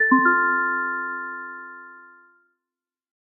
Game Menu Achievement
menu, win, mute, event, ui, end, game-menu, lose, application, beep, button, buttons, blip, synth, click, timer, clicks, gui, achievement, bloop, correct, uix, puzzle, sfx, game, bleep, startup